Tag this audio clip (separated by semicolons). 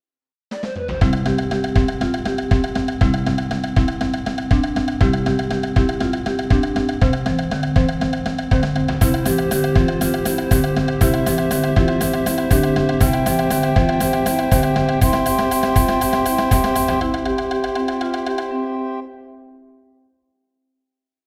major; music; playful; happy; high-tempo; cheerful; themesong